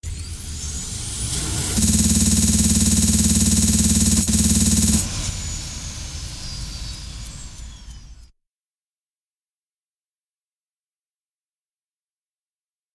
I used fl studio 11 to create this sound. By editing a washing machine's sound and adding drum samples I got this minigun sound.